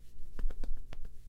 43. P2 movimiento de cabeza
Sacudir, cabeza, mojada